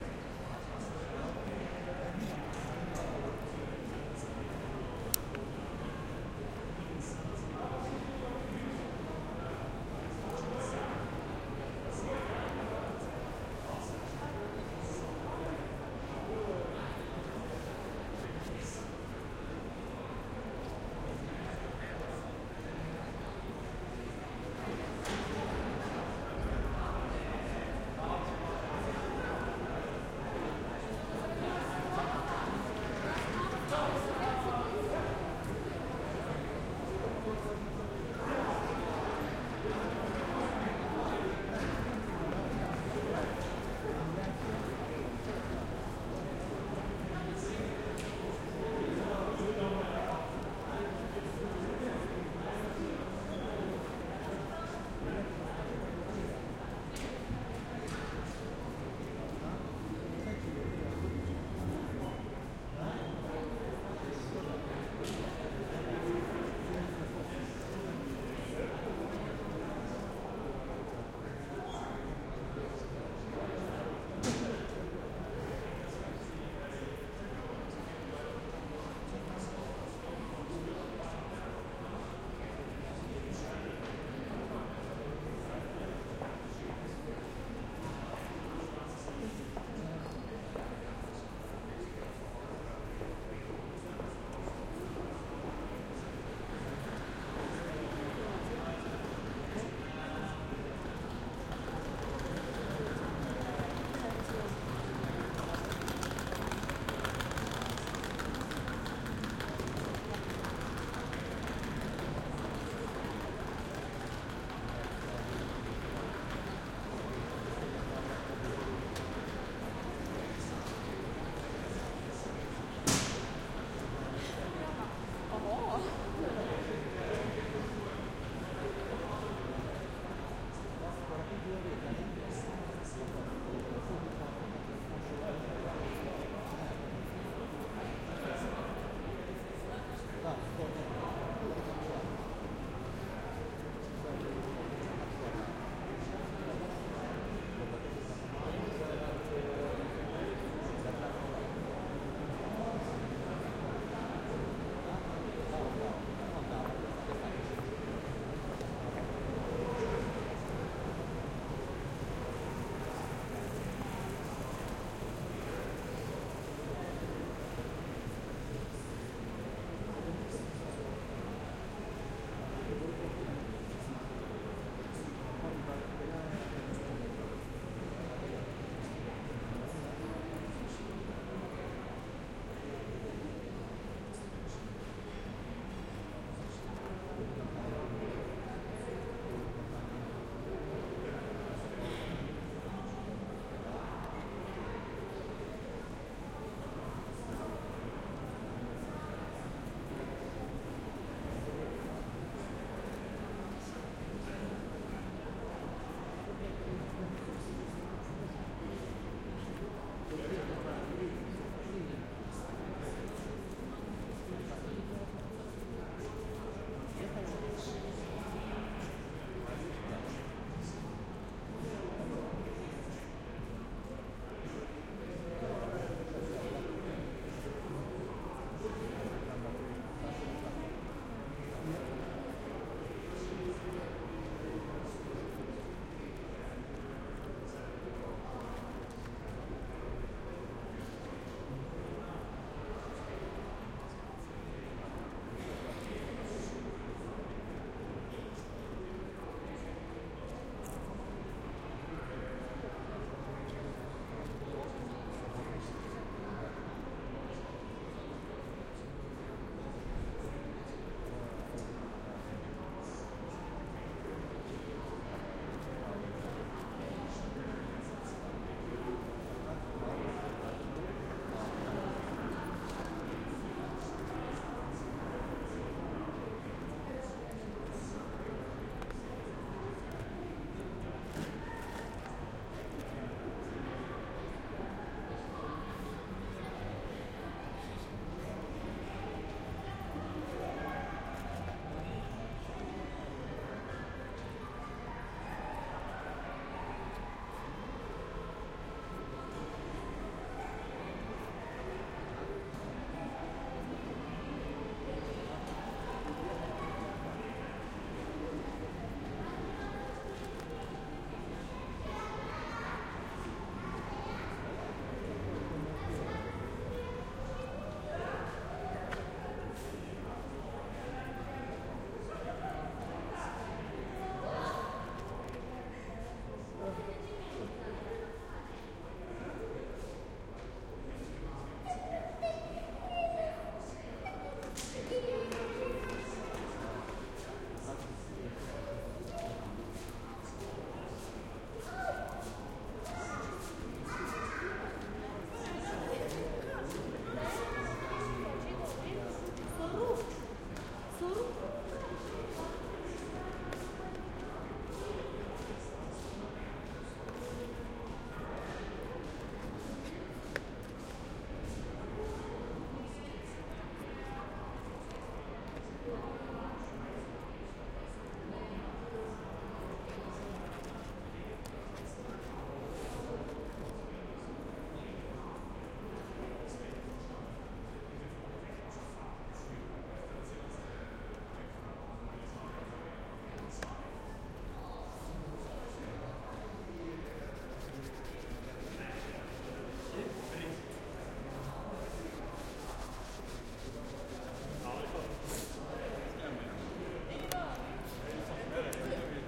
Gothenburg Train Station
The train station in Gothenburg. Recorded in march 2014
hall, field-recording, train, Gothenburg, station